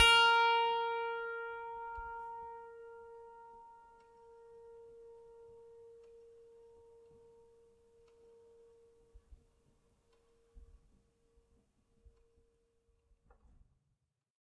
a multisample pack of piano strings played with a finger